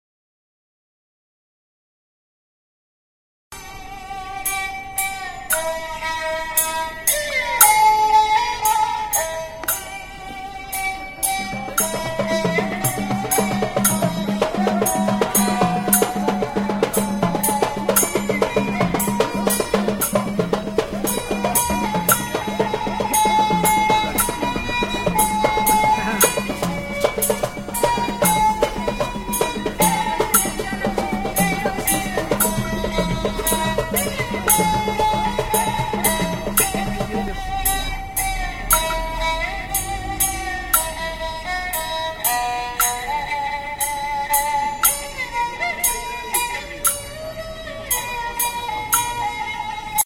sounds mashup xiaoyun yuan
they are sounds of two different type of music instruments.
Here are the original pieces:
1.Erhu at Luxun Park in Shanghai by Taurin Barrera
A recording from Luxun park in Shanghai, China. An old man is playing an erhu by the edge of a pond. You can hear the amplified erhu as well as some of the park.
Recorded with an Edirol R-09 HR in Shanghai, China, Spring 2011.
2.Africa Pavilion Drum Jam by RTB45
Recording of a rather hot impromptu African drum jam made at the Africa Pavillion, World Expo, Shanghai China.
Recording with Sony PCM-D50
Chinese, instrument, african